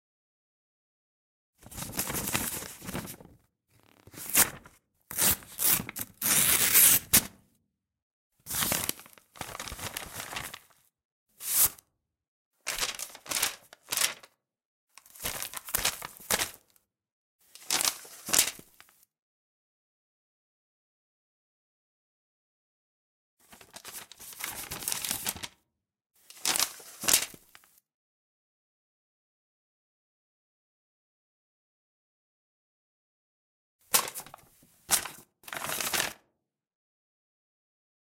Distinct sounds of opening a paper envelope, pulling out a letter and moving the paper around in different ways. This was originally recorded for a theatre play with a pantomime sequence of handling a letter. Recorded with a matched pait of Rode NT 5 Microphones.
crumple, letter, paper, rustling, tear